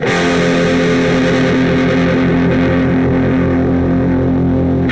Power chords recorded through zoom processor direct to record producer. Build your own metal song...
chord, electric, guitar, multisample, power